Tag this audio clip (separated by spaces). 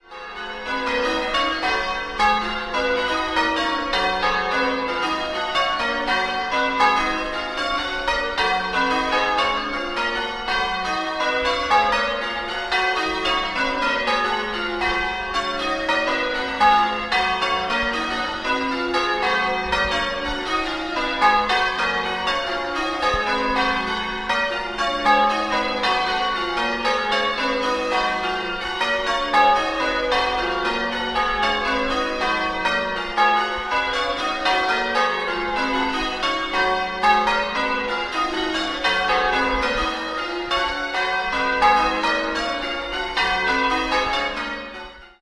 churchbells close bell bells